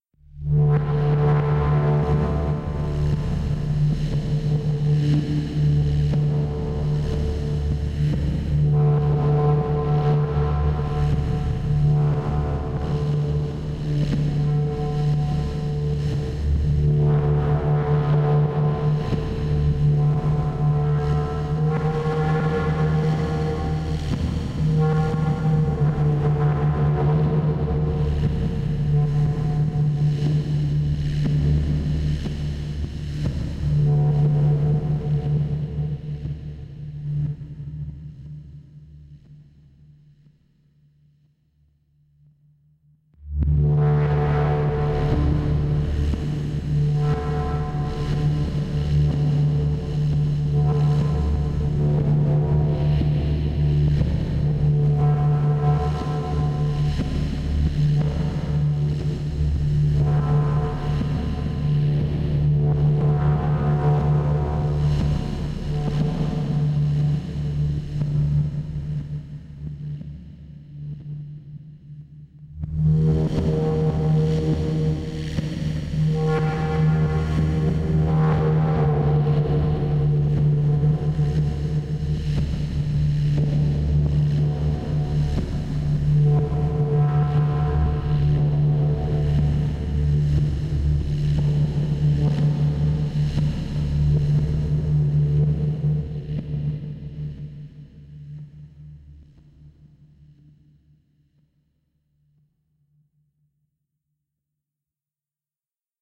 Somethings Coming - Atmosphere - by Dom Almond
Dark Tense Music Atmposphere
dark, game, movie, music, pads, tense